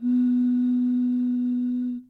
Bottle Blow
A short recording of me blowing into a single 8 ounce plastic bottle of Coca-Cola. Recorded with an Audio-Technica AT2020.
blow, sound, air, bottle, pipe, wind, coca-cola